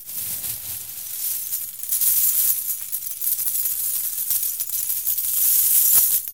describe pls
Coins Pouring 07
A simple coin sound useful for creating a nice tactile experience when picking up coins, purchasing, selling, ect.
Coin, Coins, Currency, Game, gamedev, gamedeveloping, games, gaming, Gold, indiedev, indiegamedev, Money, Purchase, Realistic, Sell, sfx, videogame, Video-Game, videogames